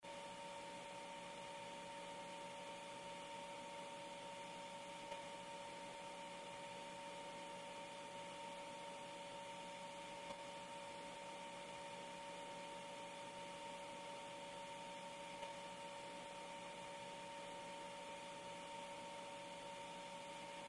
High tech computer noise Thinking
Computer noise, could be used for background noise in a machine room.
Thank you for the effort.
High, Thinking, computer, noise